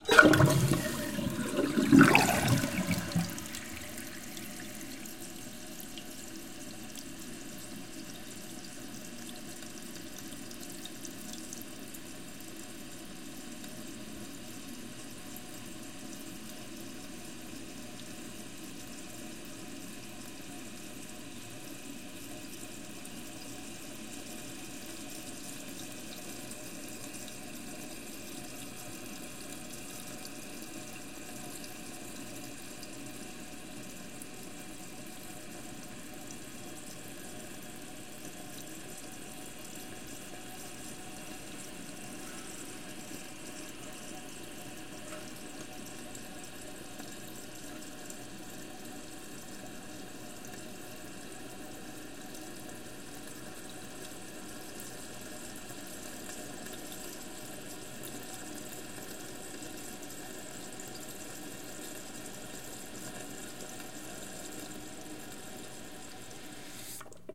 This was recorded from a Mariott hotel in Mooresville, North Carolina, United States, in May 2010. I opened up the toilet tank, stuck my Audio Technica AT-822 single-point stereo microphone near the water, and flushed.
flush, glug, gurgle, toilet, water, wet